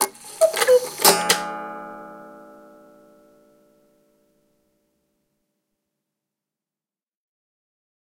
Clock (Cuckoo) - Chime half hour
Cuckoo clock chimes half hour. Simply one cuckoo and chime, no music.
chime, clock, cuckoo, cuckoo-clock, mechanism, strike